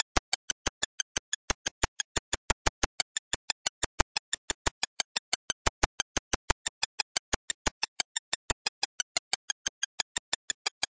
hg beat glitch 6Hz 001
A 90 bpm loop made in Hourglass from various files read as raw audio data.